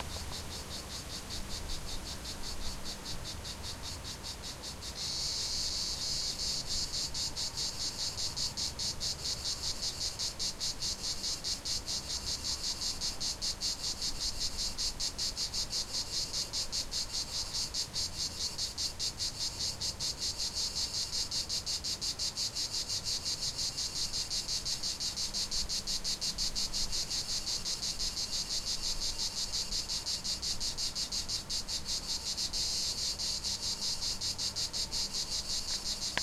Valece, komische Grillen, zirpen
some strange cricket nois near a street